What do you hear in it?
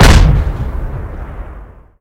Dark Detonation Type 03
This sample is actually just a Pyrocracker explosion.I recorded this with my Handy mic.This sample has been Modified using Fl-Studio 6 XXL and Audacity.this Sound have been processed several times to generate this "Bassy" Sound